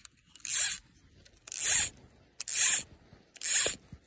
Fly fishing Stripping line out
This is the sound of someone stripping line out from an Orvis reel